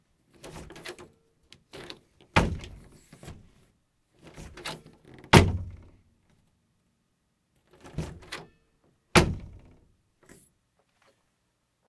Door Knob, Open Door, Close Door